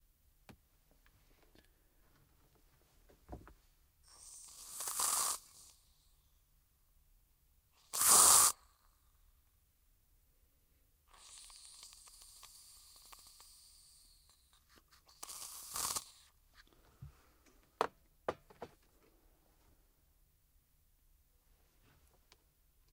Aerosol can is nearly empty
a sound of an aerosol can that's nearly empty
aerosol, puff, push